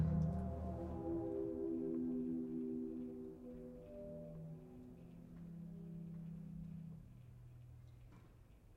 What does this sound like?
Pipe organ bellows 'dying'
The last seconds of air in a pipe organ, played with the bellows switched off
Recorded to a camera on a Sennheiser KE66/K6 In Oriel College chapel, Oxford
– hello! You're under no obligation, but I'd love to hear where you've used it.